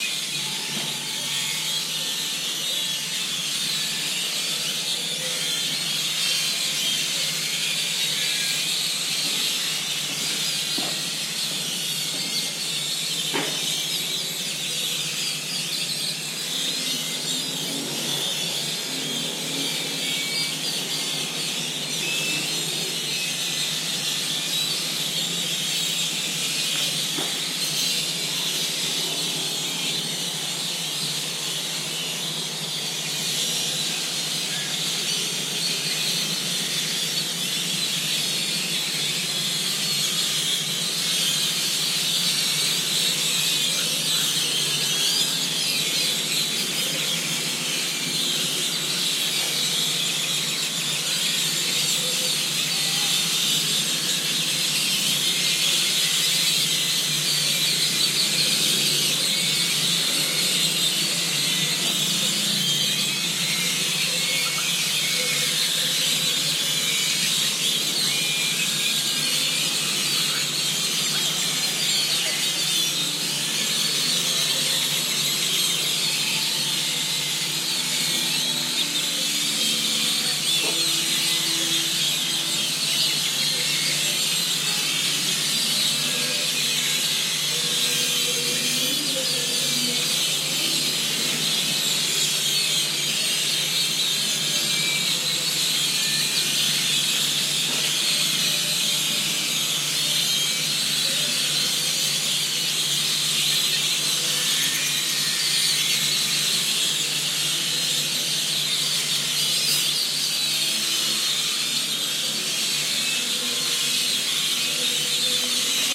Flocks of Birds
Flock of birds arriving to the trees of the town square at sunset.